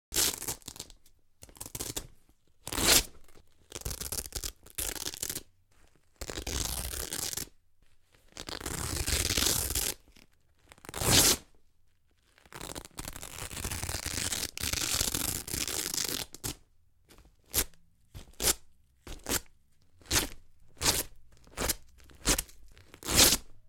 Velcro pulling apart at various speeds.